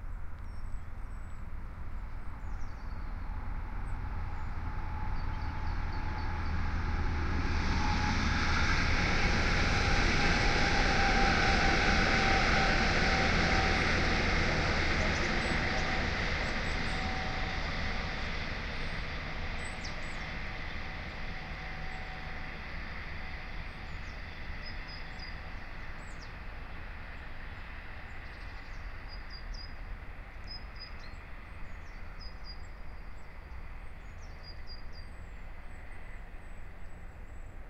A short clip: a freighttrain with a diesel engine passing by.
AEVOX IE microphone and iRiver ihp-120 recorder.